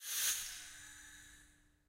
Opening a CO2 tank valve. Air releasing